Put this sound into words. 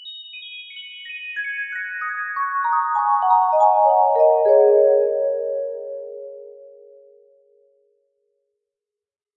[24] s-vibraphone penta down 2
Vibraphone notes I played on my Casio synth. This is a barely adjusted recording.